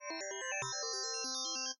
140 beats per minute